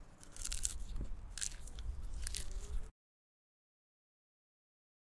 eating popcorn
field-recording, OWI